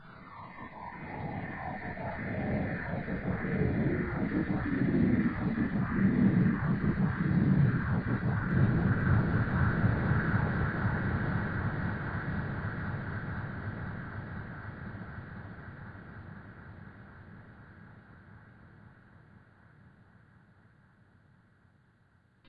this sound is made by myself, which means just recording some voices i generated with my voice/mouth.
manipulating the recordings with effects of Audacity